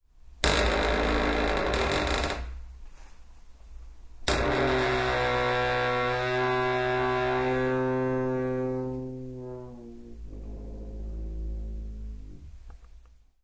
door creak 2
door creaking
recorded with a EDIROL R-09HR
original sound, not arranged
door, house, creak